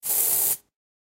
Aerosol Spray Hiss Sound